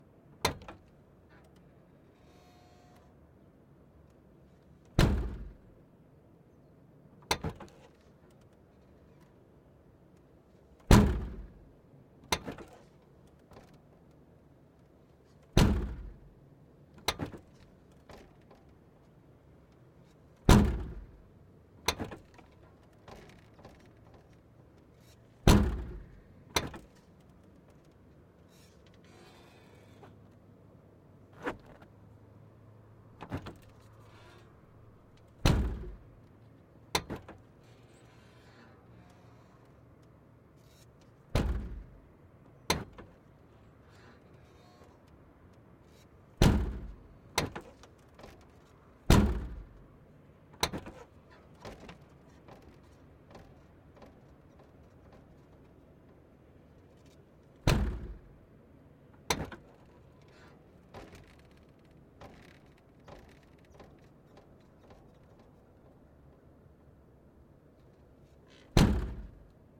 Clip featuring a Mercedes-Benz 190E-16V trunk being opened and closed. Recorded with a Rode NTG2 1 foot away from the tail light, straight back.